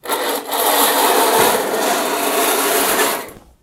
Old curtains being moved on metal curtain rail - one, long slide
The sound of curtains on a metal curtain rail being moved, creating a loud and slightly-jarring scratching noise.
Similar sounds available in the Curtains pack.
Recorded with a Zoom iQ7.